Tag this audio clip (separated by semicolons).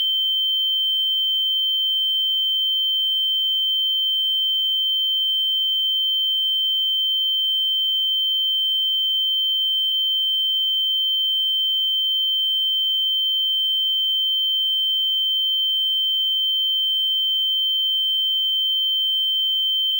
ear; ears; hearing; impaired; impairment; noise; ringing; sound; tinnitus; white